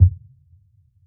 a bassy piano lid closing